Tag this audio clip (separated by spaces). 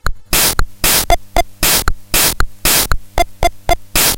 80s casio drumloop loop pt1 retro samba